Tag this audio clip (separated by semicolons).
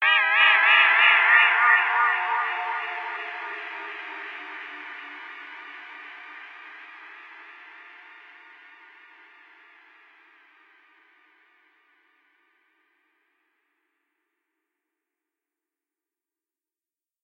effects; FX; Gameaudio; indiegame; SFX; sound-desing; Sounds